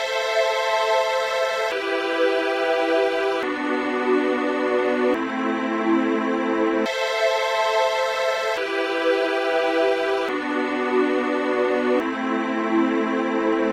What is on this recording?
Trance Started
keybord, pad, trance